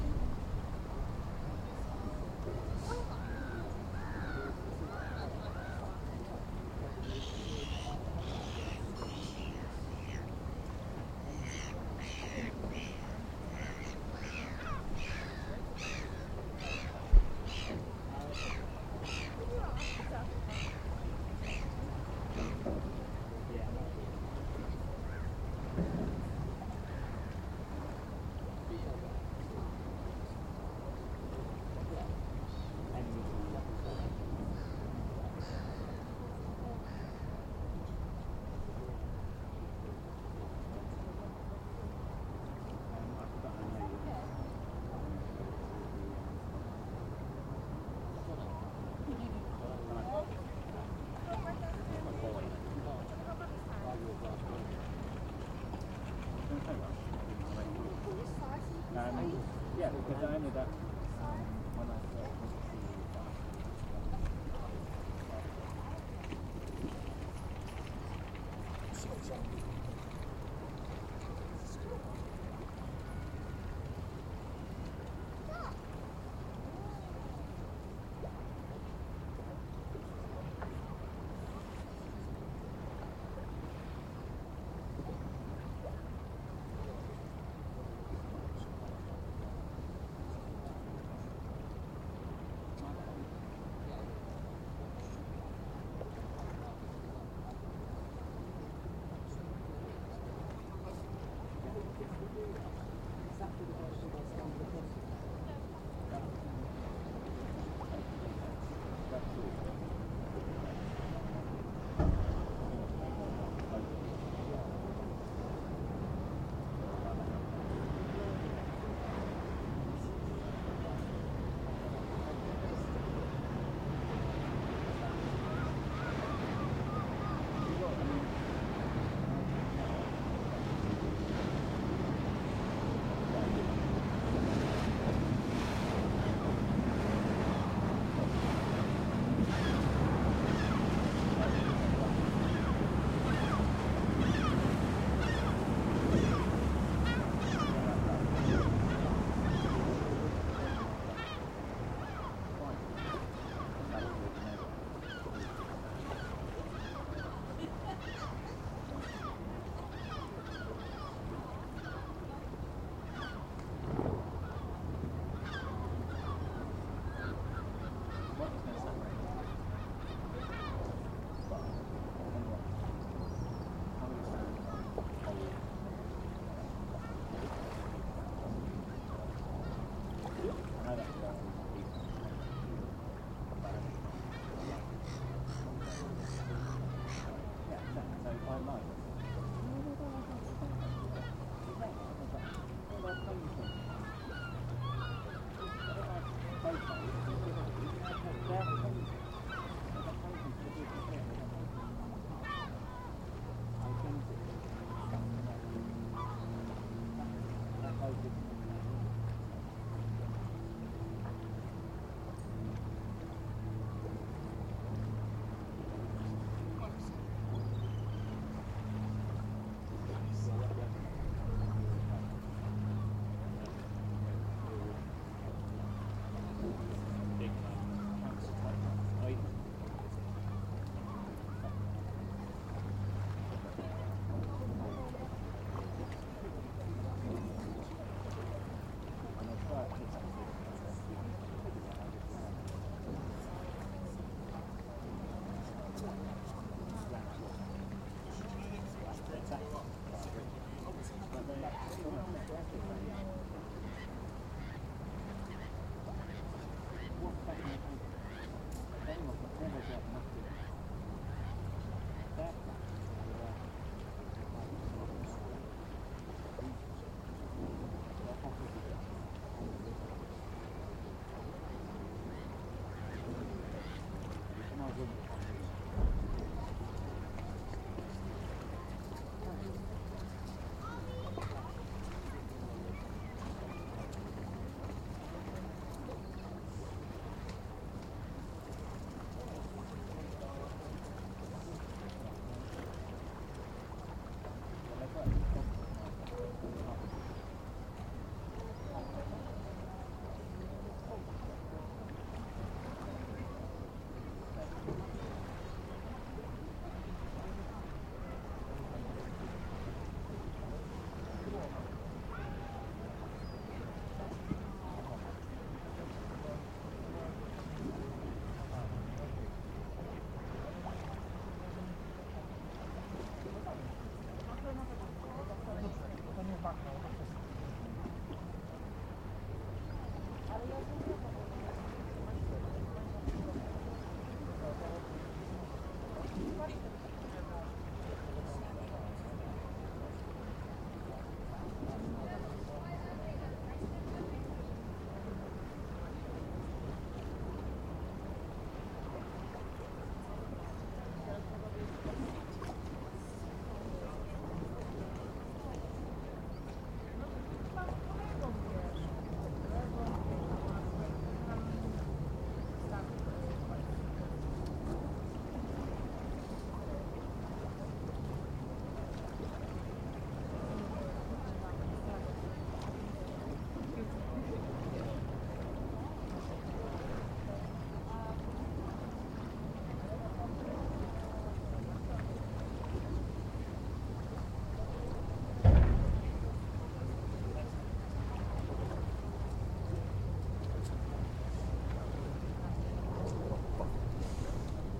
City river ambience
Recorded on Zoom H4n.
An outside seating area of a London pub on the river Thames.
Relaxed chatter, seagulls squawking and occasional boats passing by.
There is also an occasional metallic bump from two boats that were moored nearby knocking into each-other.
gulls, chatter, field-recording, thames, sea, city, ambience, london, river, boat, pub, ambient, people, boats